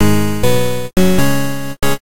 feeling strong
game, hero, optimistic, strong